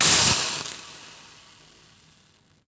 Cold water splash on hot plate 1
Dropping a single splash of cold water on a hot plate, creating steam and a nice impact sound with a interesting tail. Close mic.
cold, hot, steam, steamy, drop, splash, plate, close, water